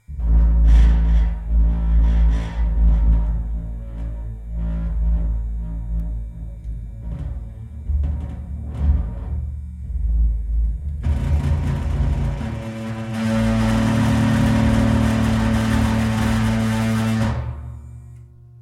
smooth torn variative - smooth torn variative
Electric shaver, metal bar, bass string and metal tank.